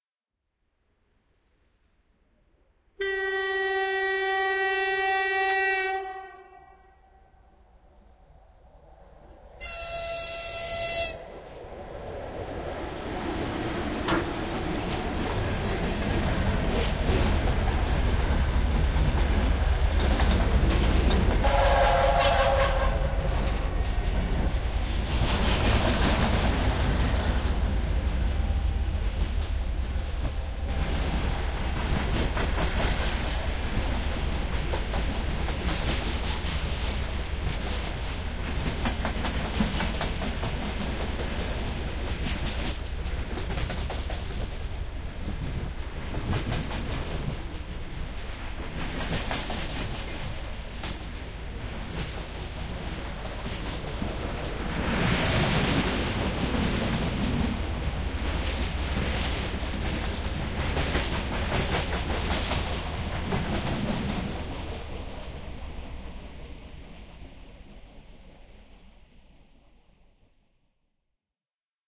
Freight train passing by Khotkovo station, 10 Oct. 2021